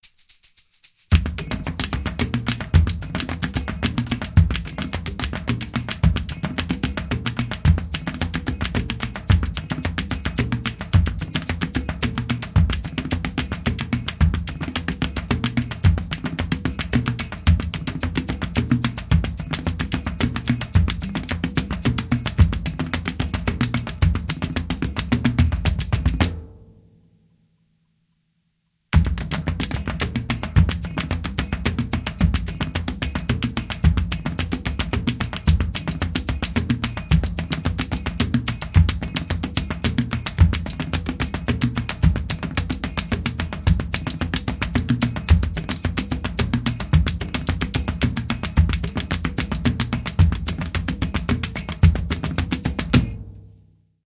Tribal Drum Pattern 2
A "tribal" style drum track that could be used for games/film/remixes/etc.
GEAR: Tama kit and various percussion instruments.
TEMPO: 110 BPM (tracked to a click, but not pushed to the grid)
TIME SIGNATURE: 3/4